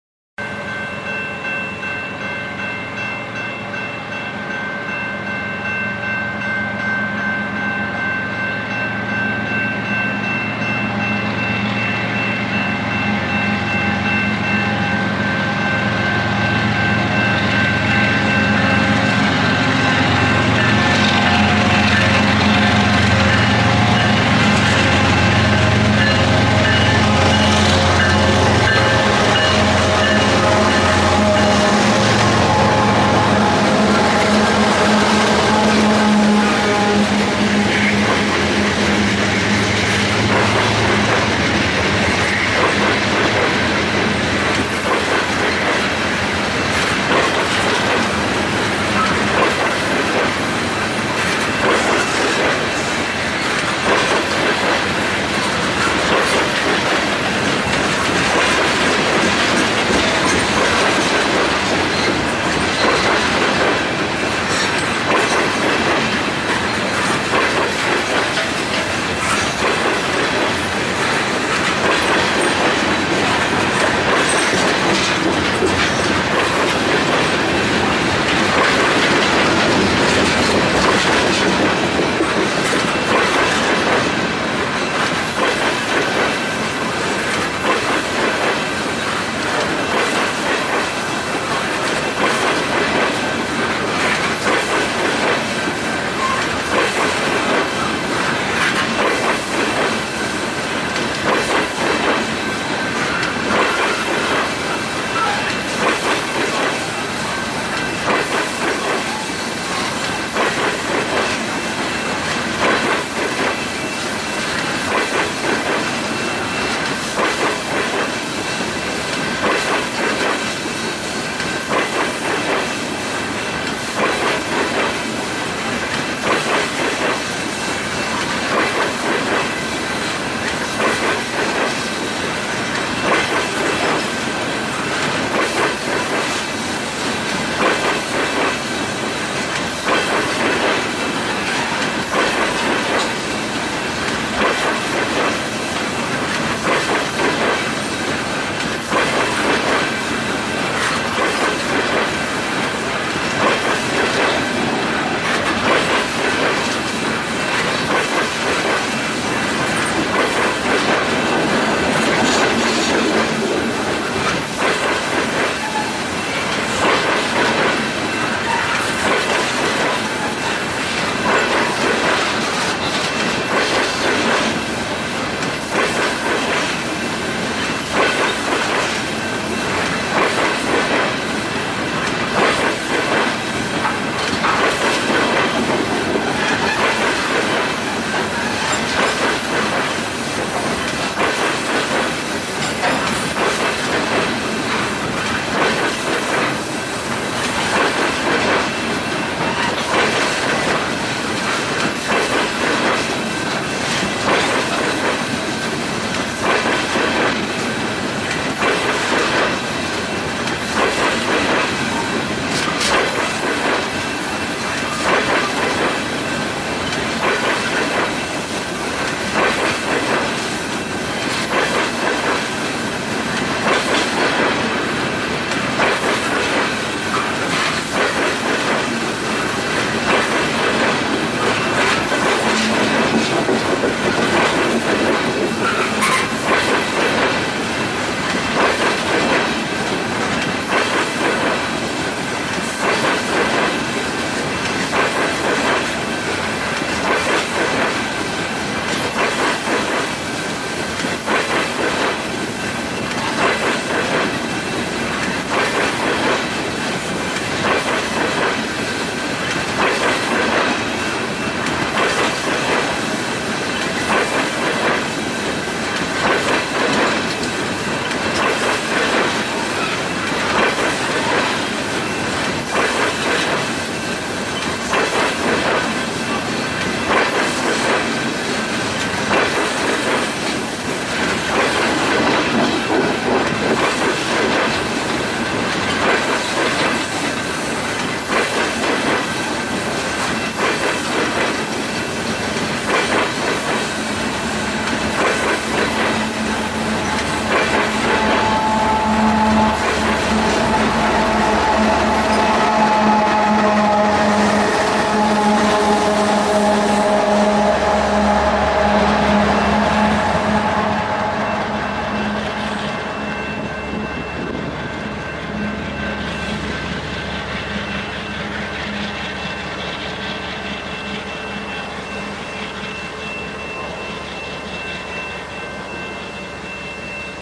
Freight Train Passing
A Canadian Pacific freight train hauling 98 fuel tankers of crude oil traveling eastbound through Toronto. Recorded at Barlett Avenue level crossing in Toronto on July 29, 2014 at 11:43am.
freight, train